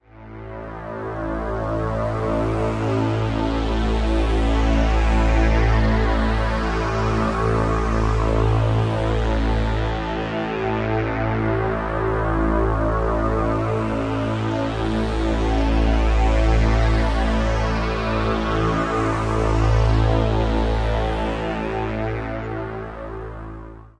A synth pad with lots of filter sweeps.
pad, texture